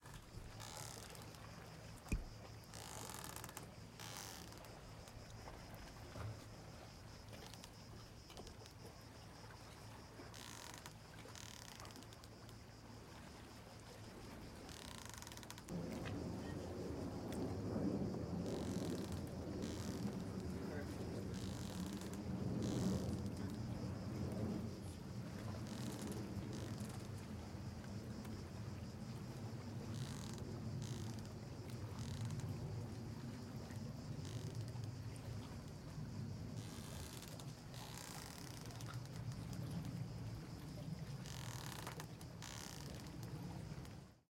Ambience Sea Boat Night Ropes 3
rope squeaks on boat